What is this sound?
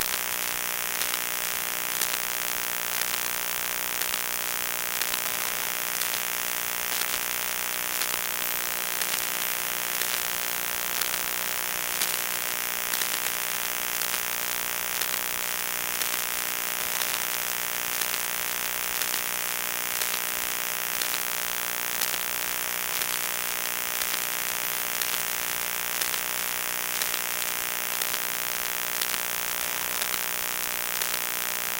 Phone transducer suction cup thing on various places on the laptop while running, opening windows, closing windows, etc.
buzz, electricity, electro, hum, magnetic, transducer